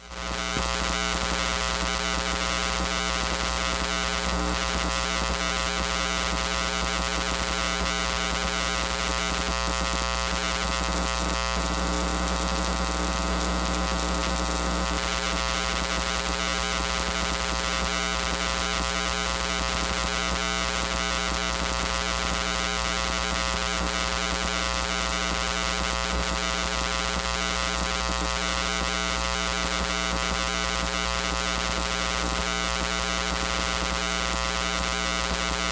INTERFERENCE, LOST SIGNAL, STATIC 02
Static produced from pointing a vintage dynamic microphone to a laptop's AC adapter while plugged to the mains.
Microphone: AIWA DM-65
SESFX ID: 645319.
fuzz, noise, static